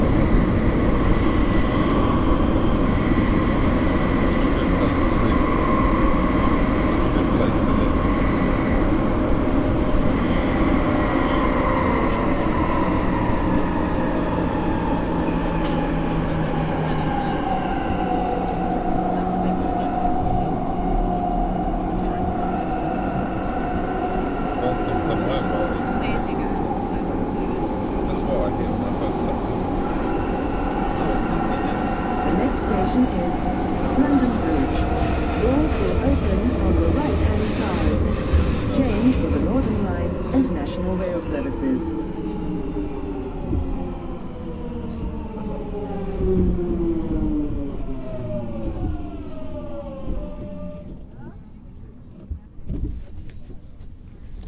Tube Stopping At London Bridge
ambient, city, field-recording